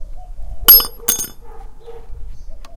glass break1
Actually a small peice of twisted metal falling onto concrete.